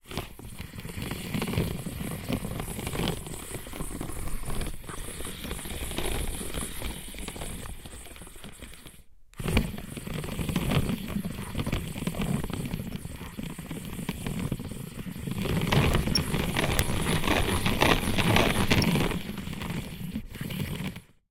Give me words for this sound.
Salad spinner
Mike at 4" distance.
Salad spinning mechanism miked while being operated.